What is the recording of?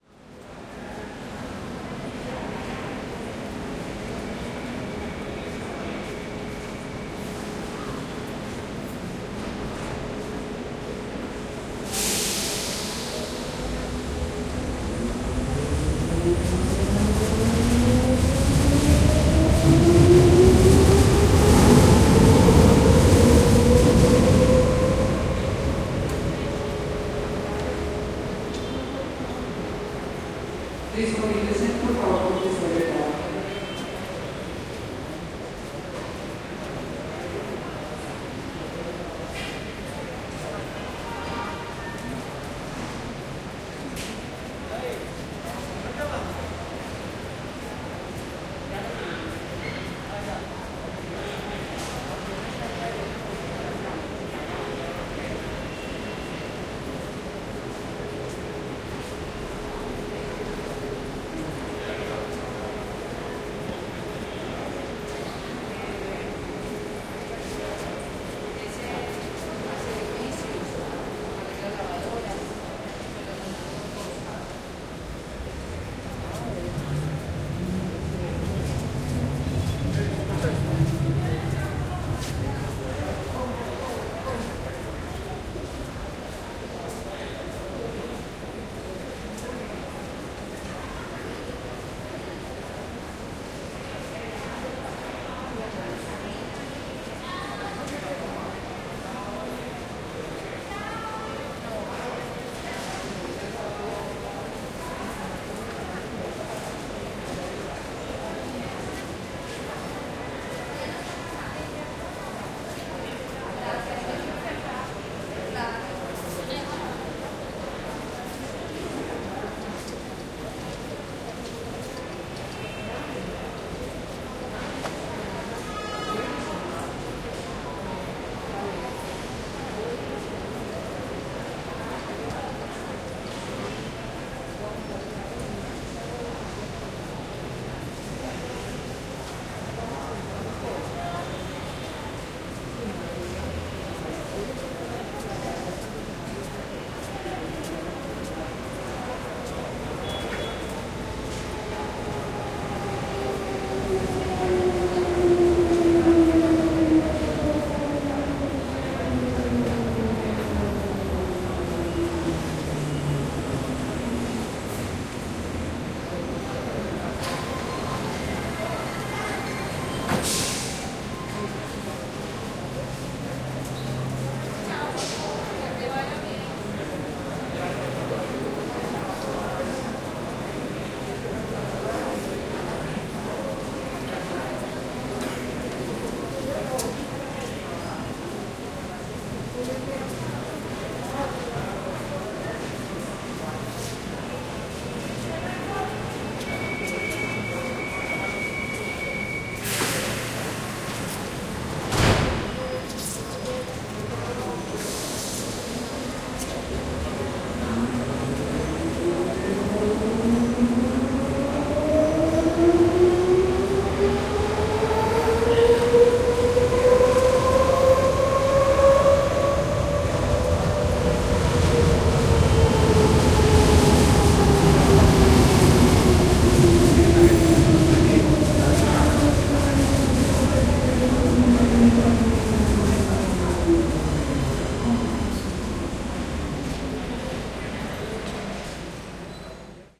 Medellin Metro Walla Calm AmbiX
Walla from a Medellin's metro station in a calm day AmbiX. Recorded with Zoom H3-VR.